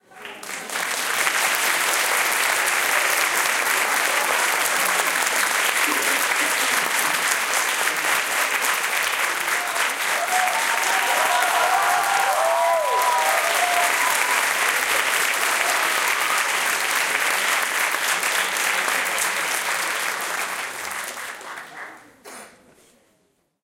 Nagranie oklasków na początku koncertu Jakuba Jurzyka w Białołęckim Ośrodku Kultury 15 marac 2015 roku